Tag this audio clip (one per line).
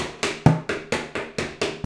130-bpm
acoustic
ambient
beam
beat
beats
board
bottle
break
breakbeat
cleaner
container
dance
drum
drum-loop
drums
fast
food
funky
garbage
groovy
hard
hoover
improvised
industrial
loop
loops
lumber
metal
music